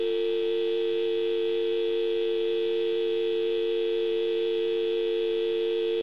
BT Dial Tone